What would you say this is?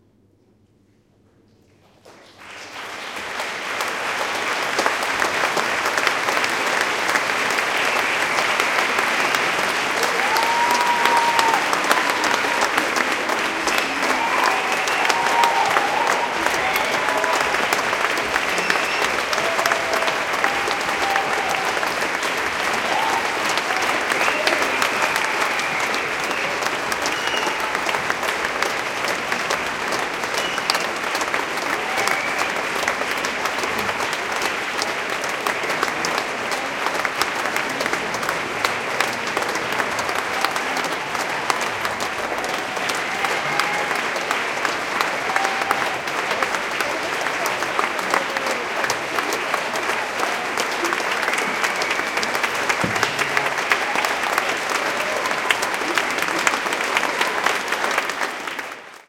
Upcoming applause at the end of a theatre piece in a small theatre in Berlin, approximately 300 spectators. Zoom H2